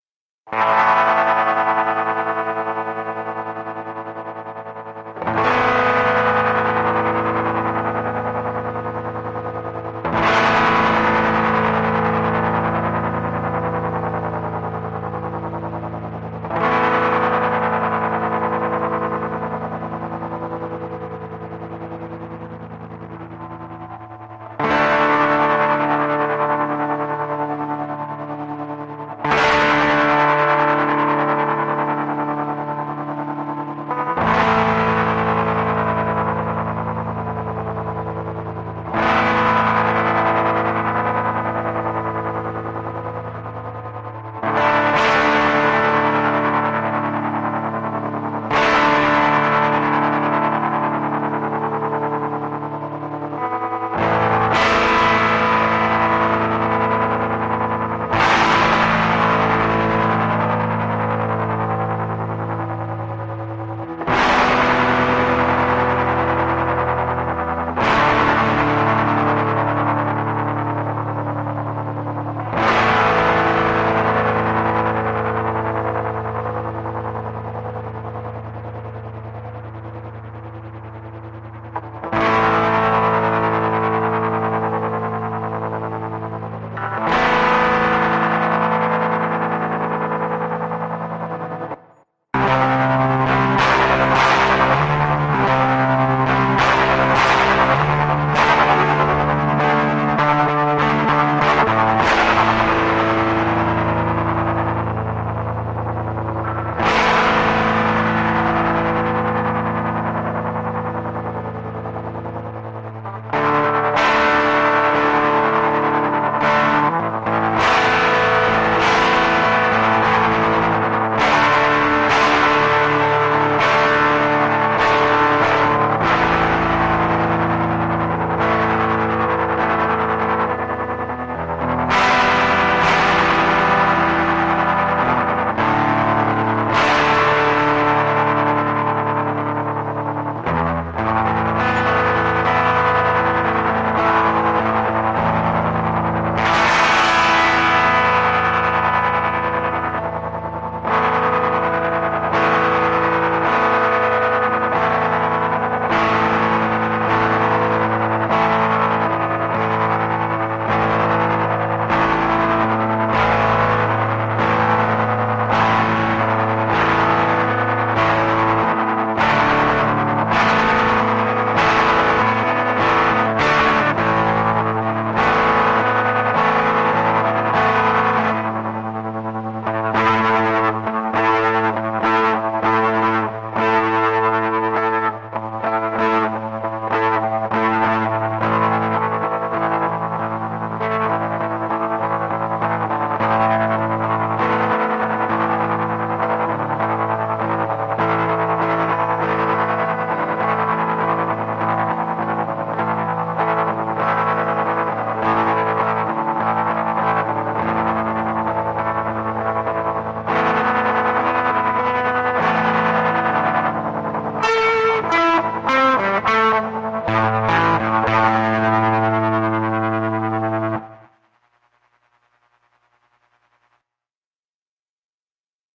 rhythm guitar with tremolo effect in a minor